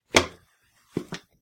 Footsteps-Step Ladder-Metal-09-Down
This is the sound of someone stepping down from a metal step ladder.
Run, Footstep, step-ladder, Step, ladder, metal, walkway, Walk